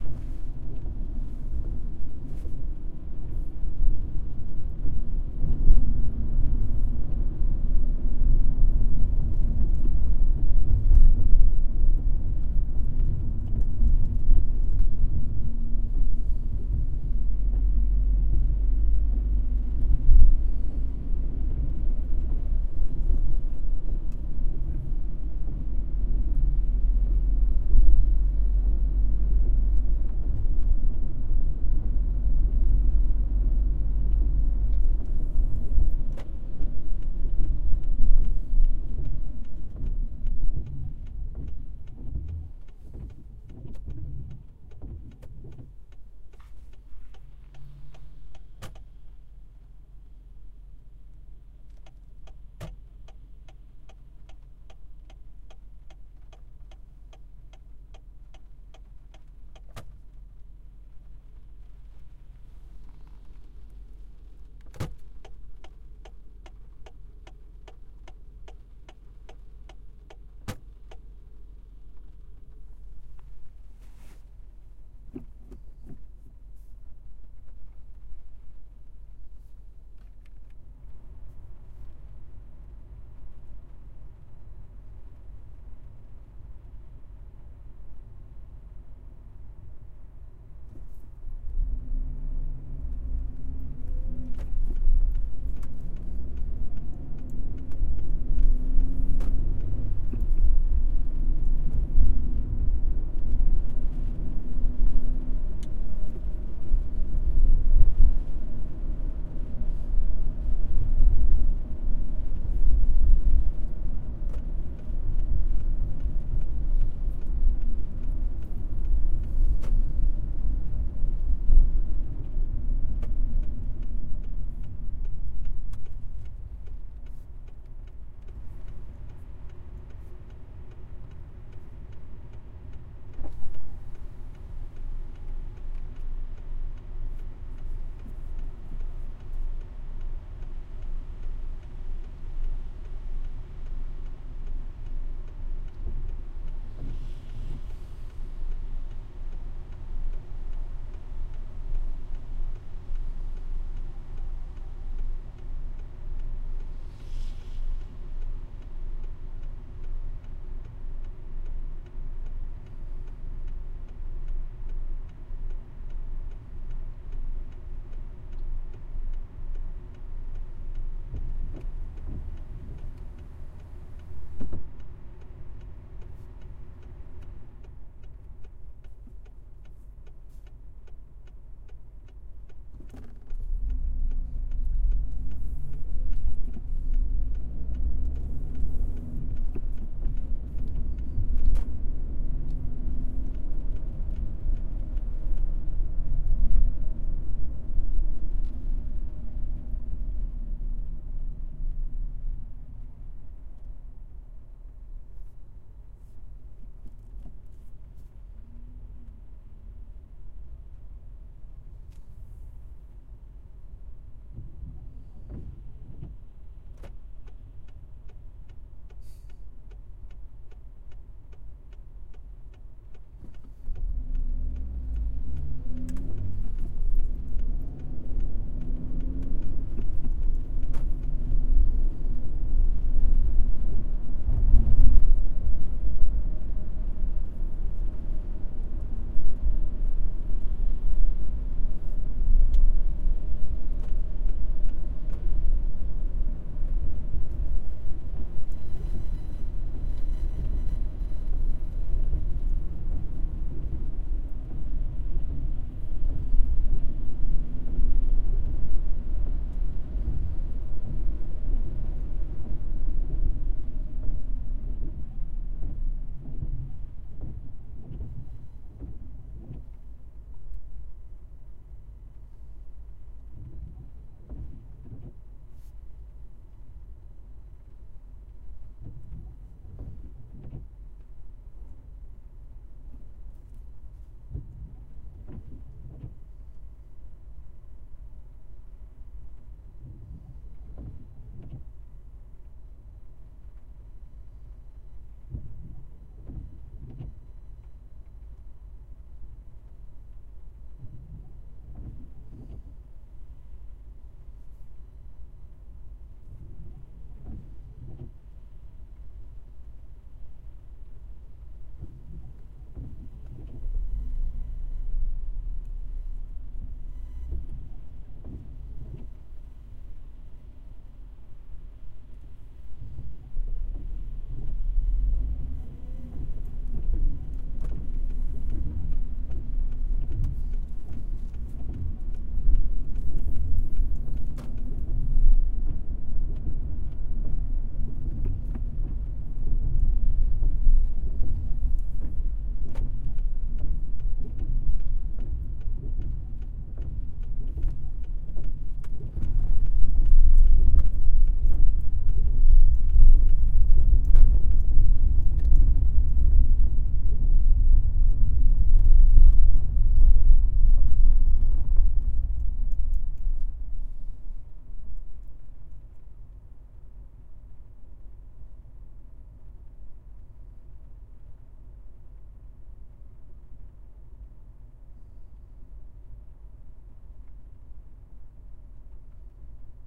Inside driving car in rain city stop n go
Field recording from inside the car of me driving in the city on different types of road (cobblestone, asphalt), You can hear the blinker/indicator often, I had to stop and accelerate a few times.
car, city, driving, field, inside, noise, raining, street, traffic, windshield, wiper